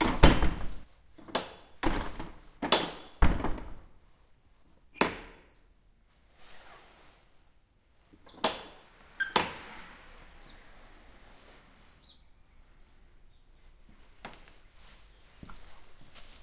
St Mullion Church Door Internal Shut 02
St Mullion Church door, opened and shut from the inside. Zoom H1 recorder.
wooden, metal, heavy, rec, Door, latch, field